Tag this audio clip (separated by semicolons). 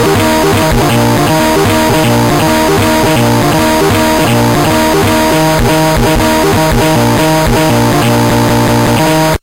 bending; circuit; toy; phone; bend; glitch